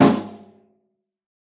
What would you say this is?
This Tom was recorded by myself with my mobilephone in New York.